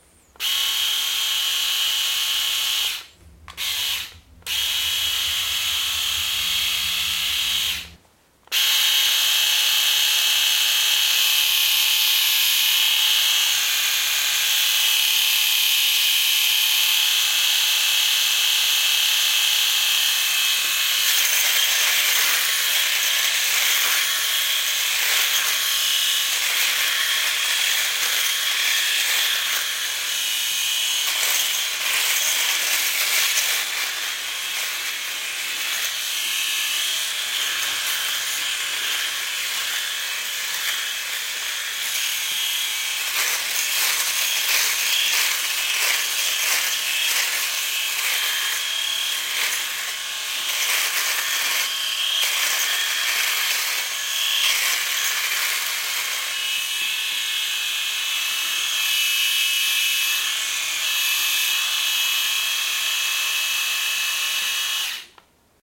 Shaver, Cheap Rotary shaver, In hand and in use.
Chin, Beard, Rotary, Buzzing, Clipper, Clean, arm-pit, Buzz, Hair, Battery-Operated, Hum, Shave, Legs, Electric, Hair-cut, Stubble, Shaver